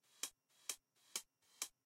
FRT CH 4130
Hi-Hat modular morph
Hi-Hat, modular, morph